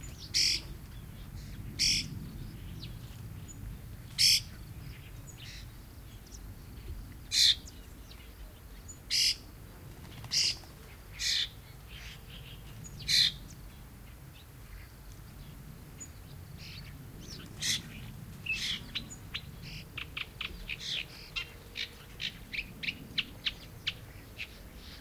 20090628.unknown.call.02
calls from an unseen bird, very close and clear. Recorded near Centro de Visitantes Jose Antonio Valverde (Donana, S Spain) using Sennheiser MKH60 + MKH30 > Shure FP24 > Edirol R09 recorder, decoded to mid/side stereo with Voxengo free VST plugin